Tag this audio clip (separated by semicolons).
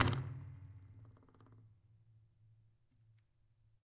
stretch; spring; metalic; acoustic; pull; wood; percussive